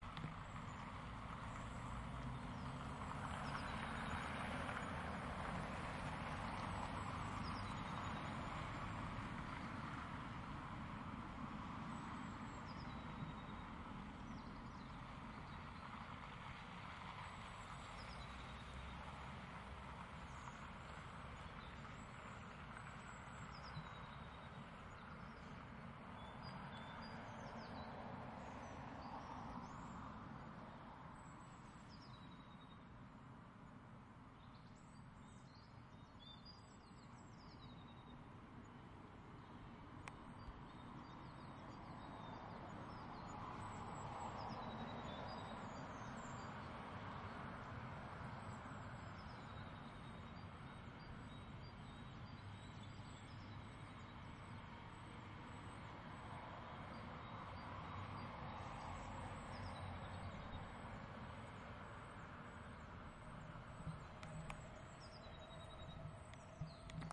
Ambient recording of traffic and birds and train